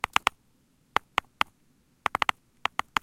Bruit résultant de coups donné sur une grosse pierre à l'aide d'une plus petite.